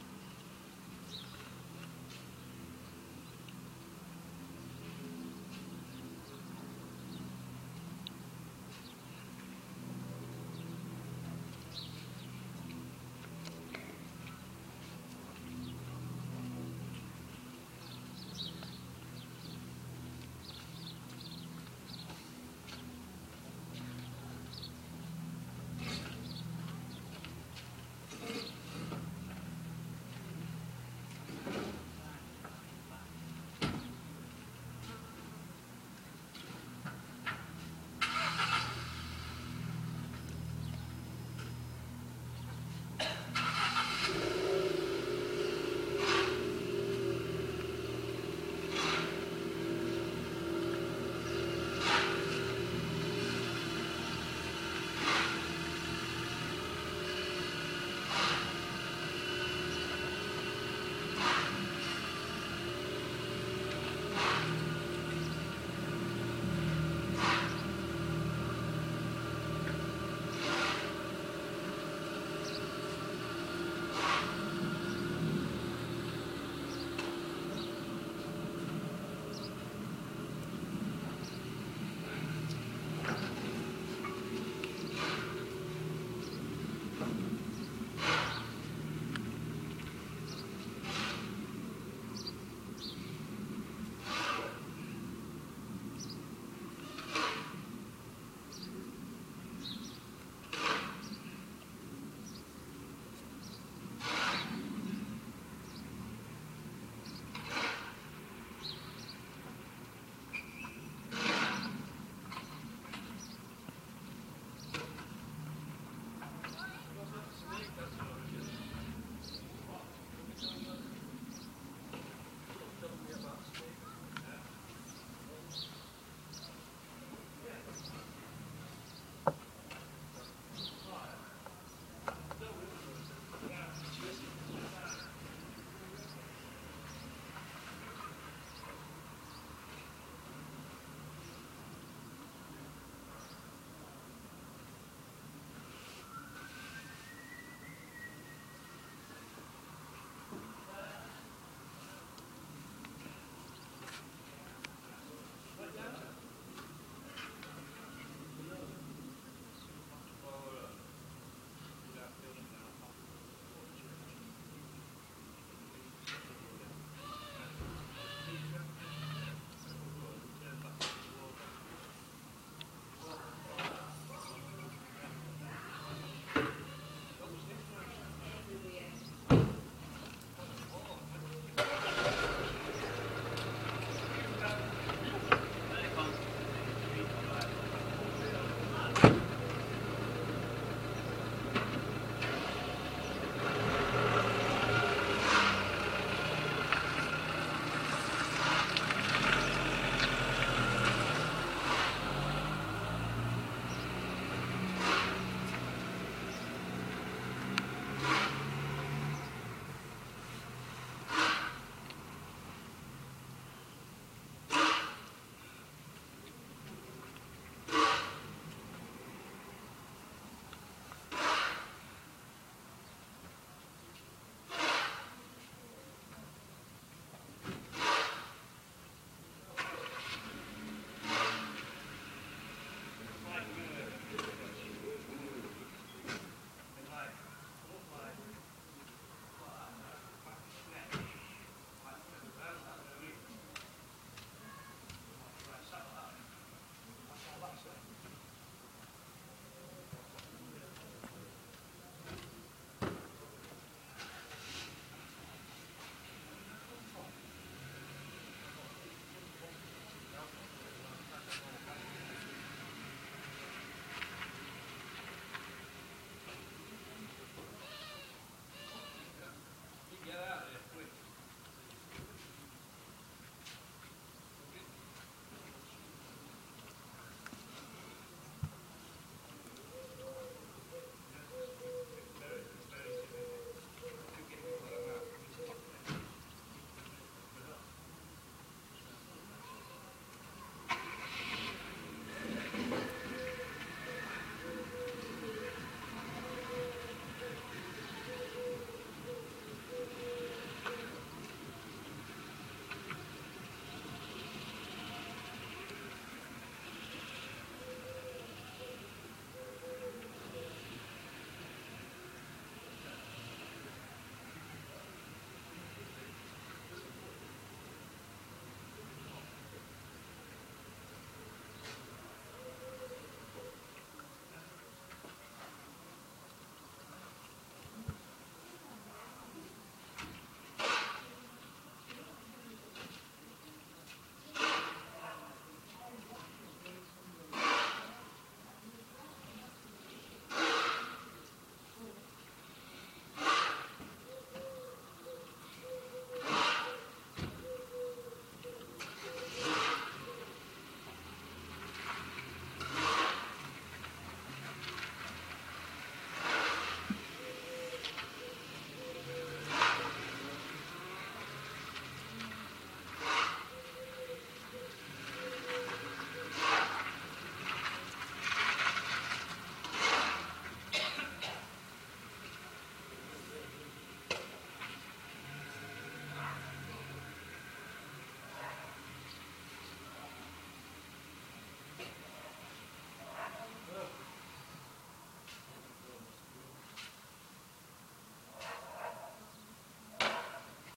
05072014 small roadworks
Suburban ambiance with traffic, a few cars driving into the small cul-de-sac I recorded this sound on, and men digging. Swinton South Yorkshire UK 5 July 2014.
ambience, car, digging, field-recording, roadworks, spade, street, swinton, traffic